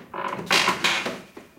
a single creak (from a home gym bike)